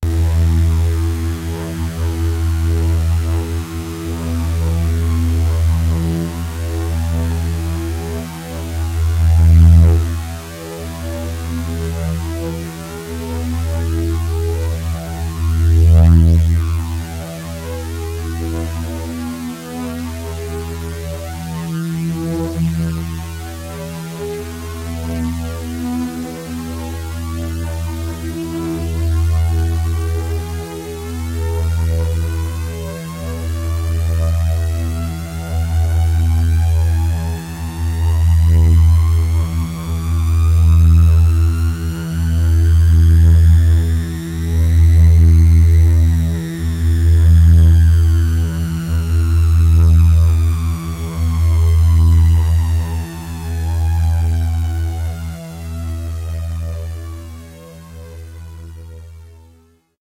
Q Saw LFO-ed filter sweep - E2
This is a saw wave sound from my Q Rack hardware synth with a low frequency filter modulation imposed on it. Since the frequency of the LFO is quite low, I had to create long samples to get a bit more than one complete cycle of the LFO. The sound is on the key in the name of the file. It is part of the "Q multi 004: saw LFO-ed filter sweep" sample pack.